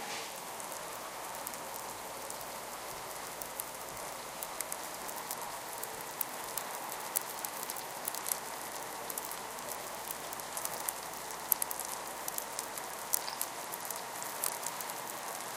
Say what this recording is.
Band pass filtered sounds of tadpoles making bubbles recorded with Olympus DS-40 with Sony ECMDS70P.